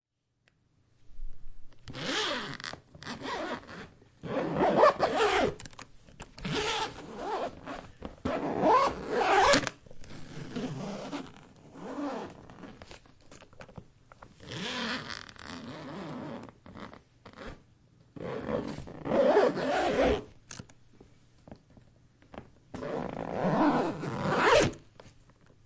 Son d'une fermeture éclair. Son enregistré avec un ZOOM H4N Pro.
Sound of a zip. Sound recorded with a ZOOM H4N Pro.
clair, fermeture, zip, zipper